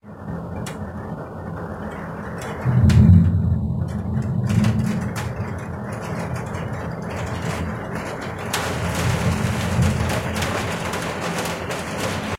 very dense afternoon summer thunderstorm in the city, with rain on the metal roof, recorded by Huawei phone, inside of the office room. you can hear how the rain intensifies after the thunder.
Recording Date: 20.06.2019